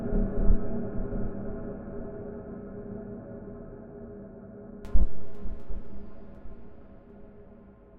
Industrial Strings Loop 001
Some industrial and metallic string-inspired sounds made with Tension from Live.
dark-ambient, strings, metallic, industrial